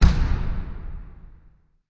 So I tried to make it myself by choosing a current beatbox sound that I pitched down + exciter +EQ and add a large reverb in Logic pro.